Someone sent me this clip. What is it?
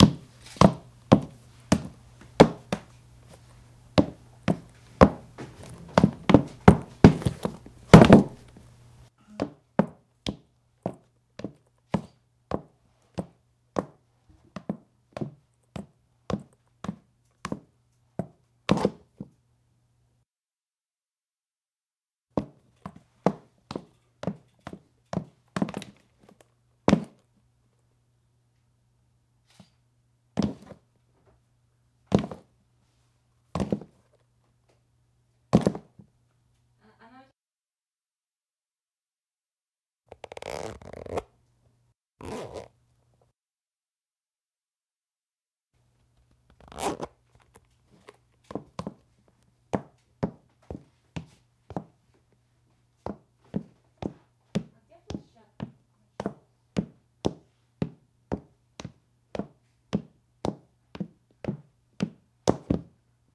High-heeled shoe heavy weight